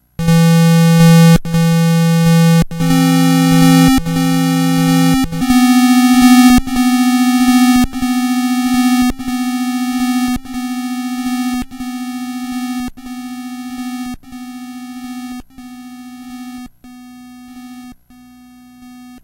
Atari FX 01
Soundeffects recorded from the Atari ST
Atari, Chiptune, Electronic, Soundeffects, YM2149